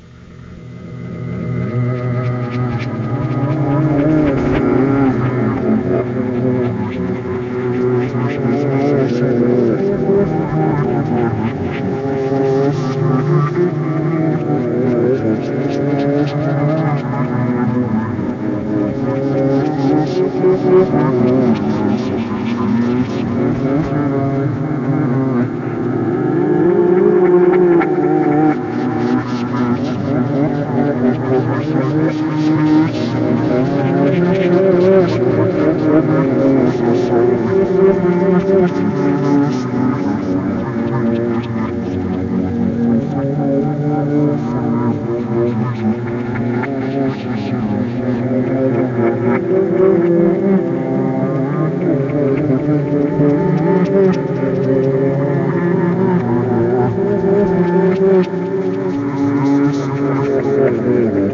Satan sings a lullaby
hell, RPG, Horror, sound, play, words, back, spooky, satanic, game, wtf, me, voice, creepy, devil